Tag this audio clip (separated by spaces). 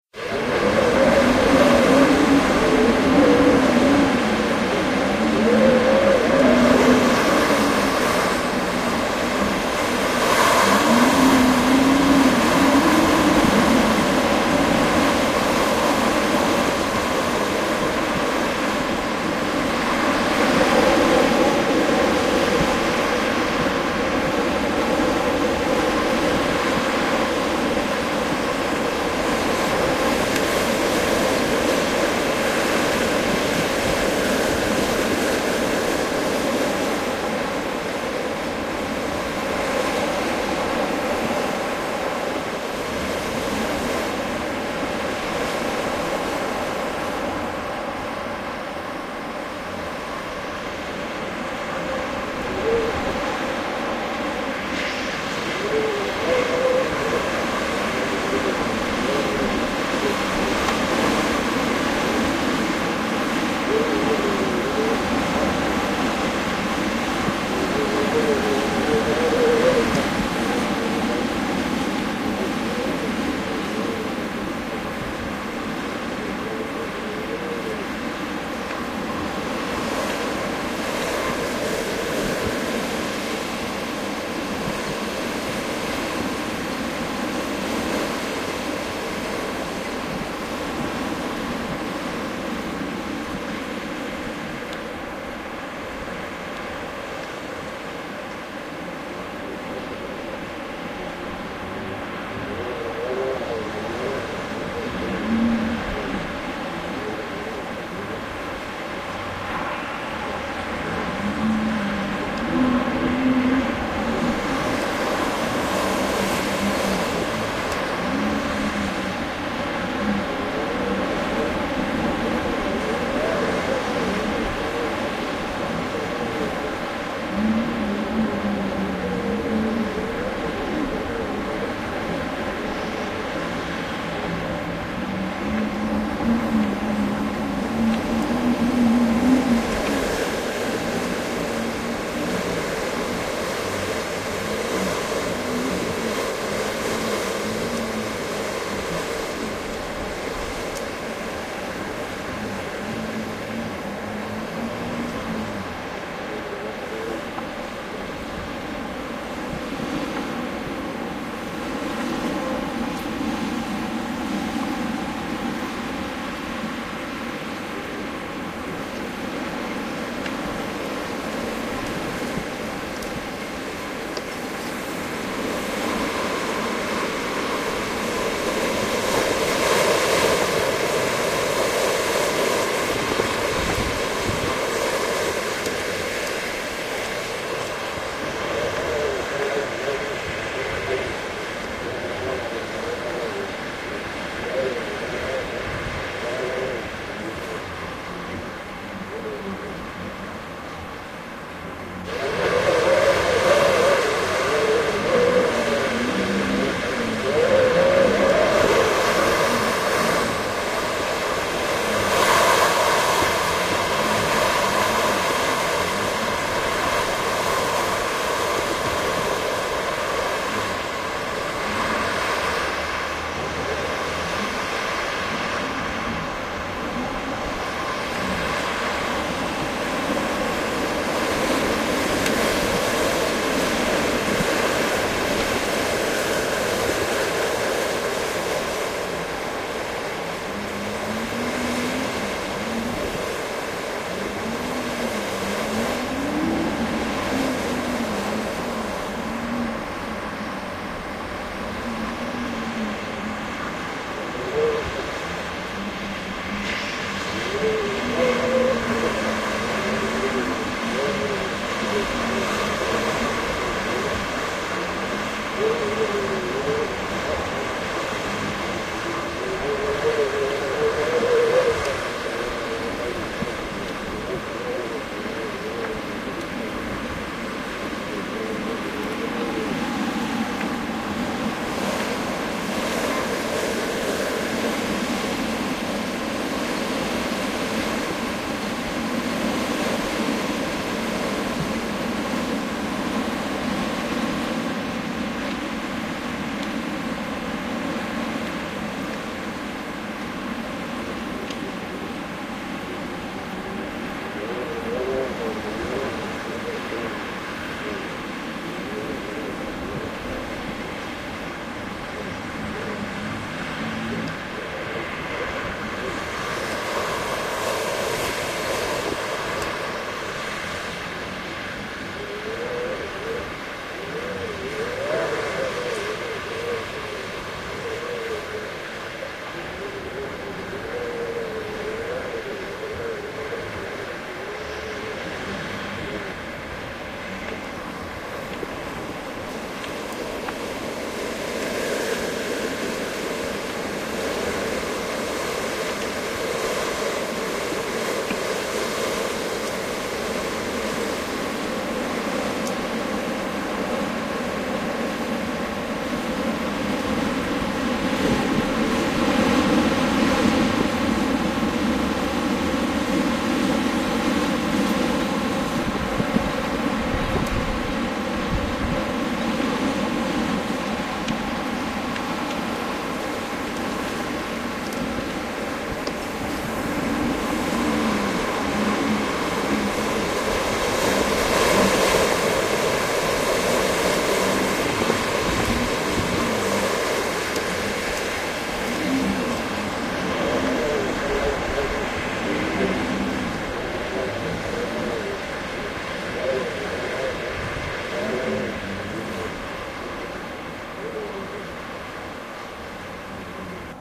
gale gust gusts nature storm weather wind windy winter